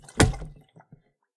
Smacking a water bottle.